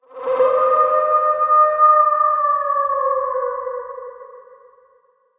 howl processed sound